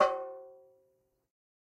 Metal Timbale left open 032
home, god, kit, trash, garage, real, conga, timbale, drum, record